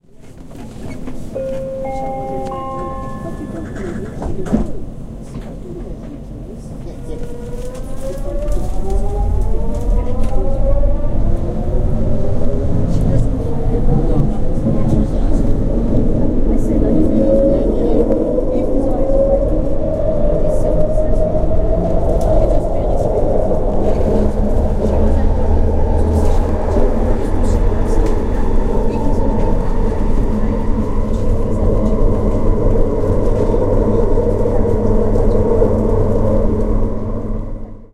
Vancouver sky train
fx, sound